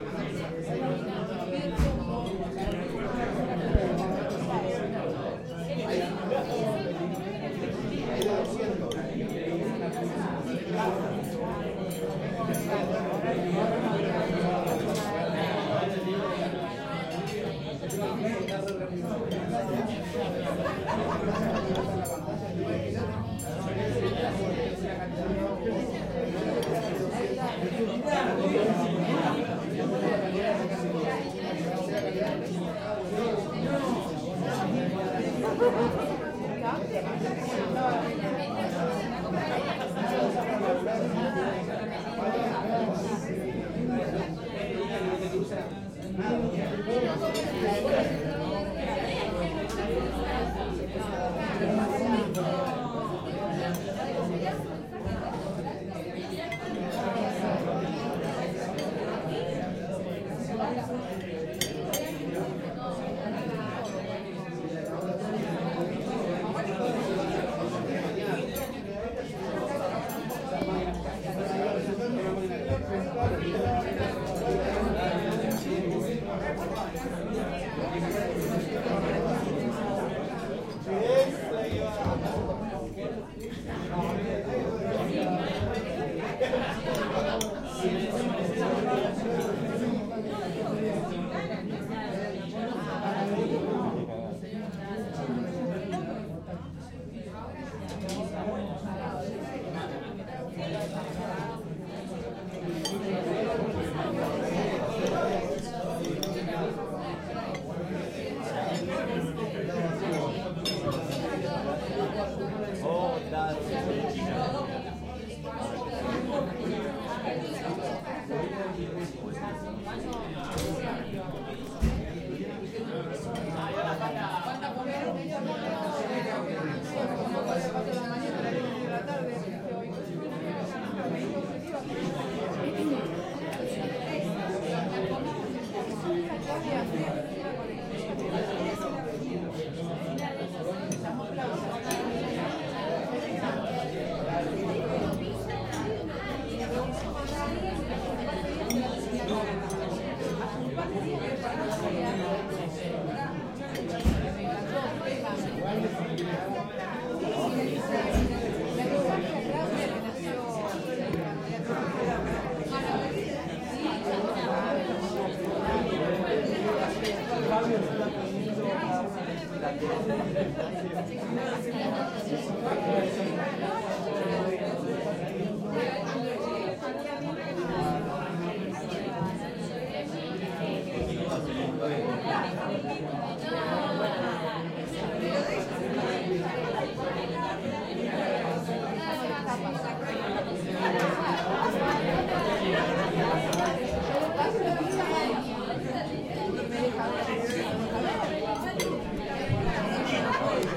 Murmullo - restaurante | Murmur - restaurant
publico, place, murmur, people, publicspaces, murmullo, peopleeating, public, eating